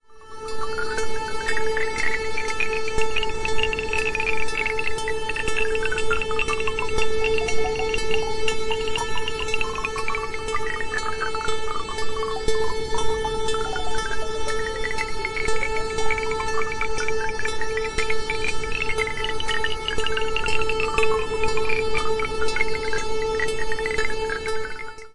Mi-Go - Supercollider
made by supercollider
fx, lovecraft, sci-fi, supercollider